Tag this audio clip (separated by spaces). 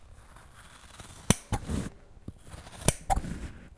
saw cutting sawing